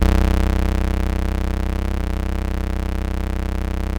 A single note played on a Minibrute synthesizer.